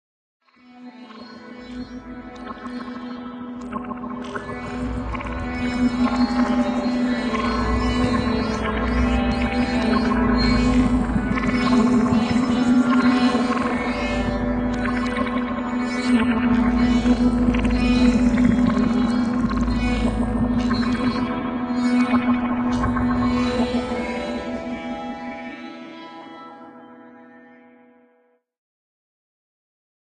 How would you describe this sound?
ambient sitar soundscape